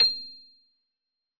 Piano ff 088